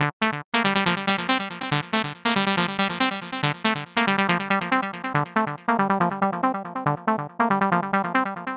TR LOOP - 0519
goa goa-trance goatrance loop psy psy-trance psytrance trance
psy, goa-trance, psytrance, psy-trance, trance, goa, loop, goatrance